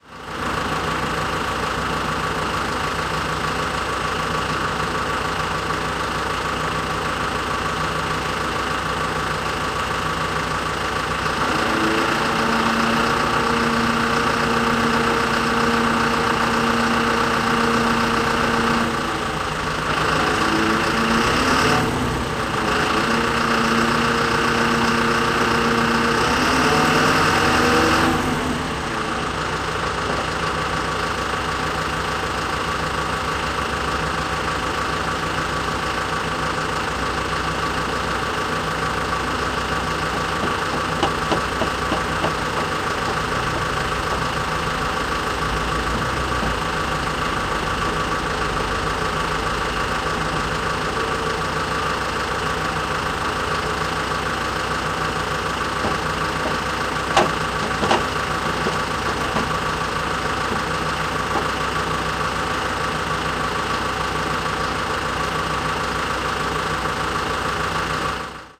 lift truck
lift, steiger, truck